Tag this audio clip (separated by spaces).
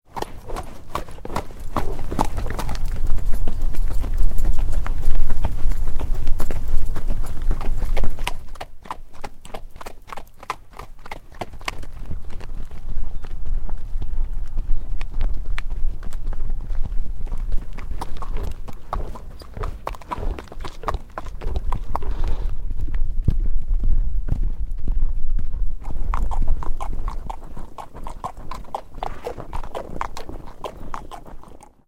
carriage clop field-recording gallop hooves horse horses